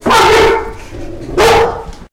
Dog Bark 2
animal, bark, dog